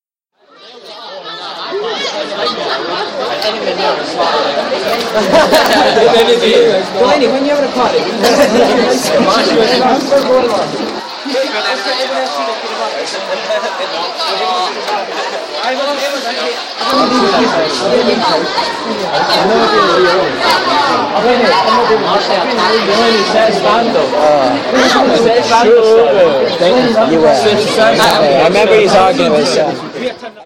The background noise, familiar to anyone who has ever been to school